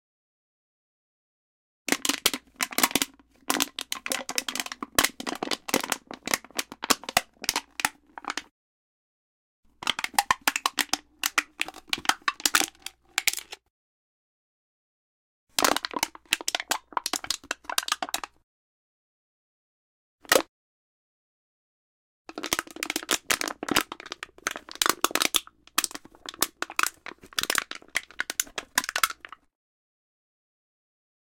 TIN CAN CRUSH
Stereo recording of a tin can being crushed.
Stereo Matched Oktava MC-012 Cardioid Capsule XY Array.